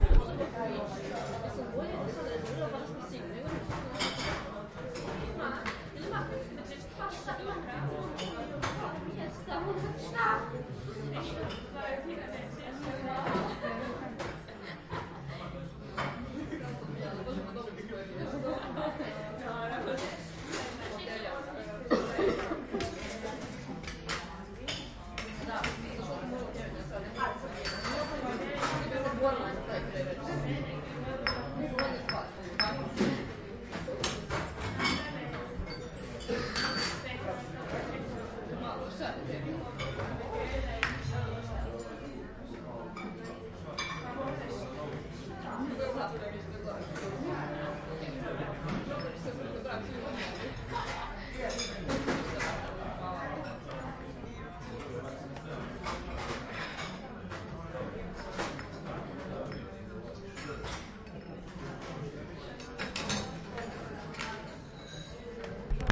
Mess room sounds
Ambience sounds of the mess room. Recorded at the time of dinner.
Atmosphere, Dinner, Restaurant